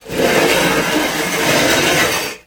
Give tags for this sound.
Chair; Concrete; Drag; Dragged; Metal; Pull; Pulled; Push; Pushed; Roar

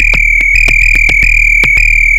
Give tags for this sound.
110-bpm; electronic; fm; loop; rhythmic

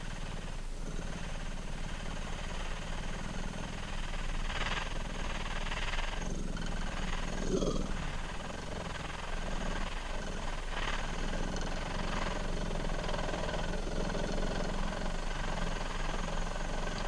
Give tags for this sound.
motor; rumble

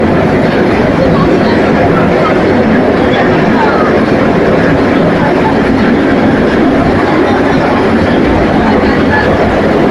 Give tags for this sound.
City; Mexico; Subway

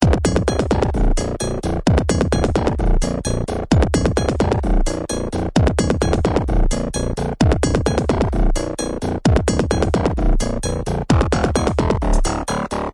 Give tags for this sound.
prism; loops; electronic; music

